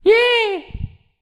Funny Cheering Shout
A funny cheery sound of a creature that had success / was rescued